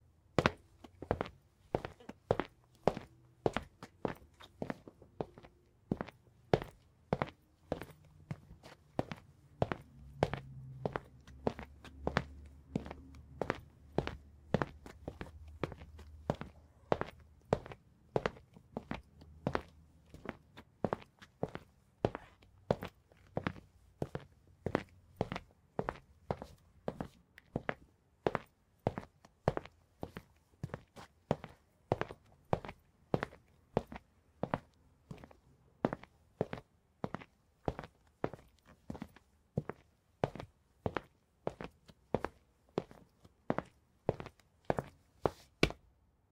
FOLEY Footsteps Laminate 001
I recorded myself walking around my office. Unfortunately, the environment isn't as quiet as I'd like; you can discern some traffic noise coming from outside the office building.
Recorded with: Sanken CS-1e, Fostex FR2Le
boots, creak, floorboard, foley, footsteps, laminate, shoes, squeak, steps, tile